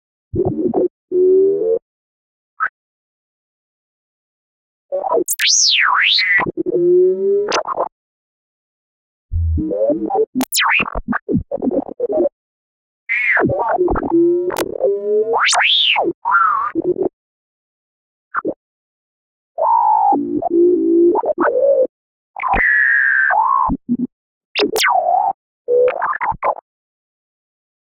Robot R2D2 Droid
A very own R2D2 droid from the classic Star Wars.